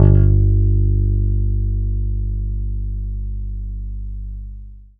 C S P-Bass pick G1
One in a collection of notes from my old Fender P-Bass. These are played with a pick, the strings are old, the bass is all funny and there is some buzzing and whatever else including the fact that I tried to re-wire it and while it works somehow the volume and tone knobs don't. Anyway this is a crappy Fender P-Bass of unknown origins through an equally crappy MP105 pre-amp directly into an Apogee Duet. Recorded and edited with Reason. The filename will tell you what note each one is.